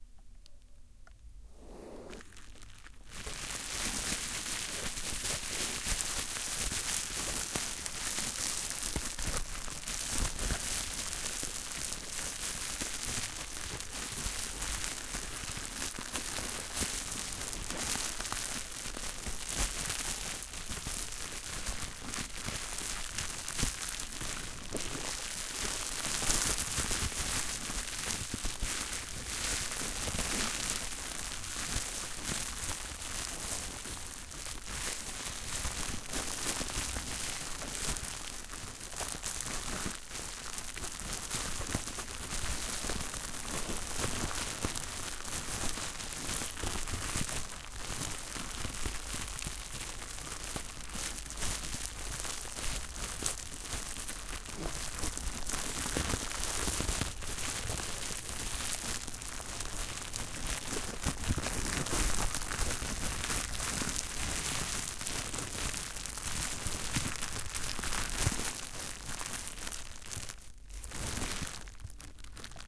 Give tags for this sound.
asmr
bubble-wrap
crinkle
crumple
crush
plastic
stereo

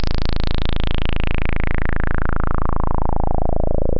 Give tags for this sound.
multisample
square
synth

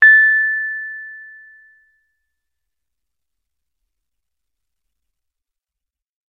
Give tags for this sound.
fender
keyboard
piano
tine
tube

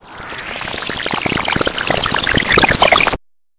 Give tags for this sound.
soundeffect
electronic

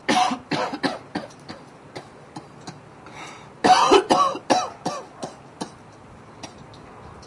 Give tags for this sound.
cough
sickman
sickness

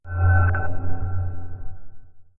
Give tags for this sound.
ambient
etheral
game
sfx
space

